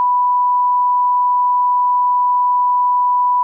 Testtone1000hz
A testtone beep used when sensoring out bad language in films.